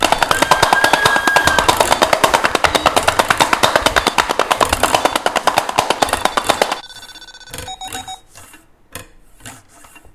SoundScape GPSUK taja,matthew,martin 5W
cityrings, galliard, soundscape